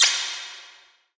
I loaded a slew of random IR files into Kontakt, played them through other IRs and into the Plate140, FairChild, and Neve1073 plug ins off the UAD card. Then I selected 5 good hits and applied Logic's offline compression and destructive fade envelopes. I loaded them into Space Designer and it produces deep/bright/spacious reverbs.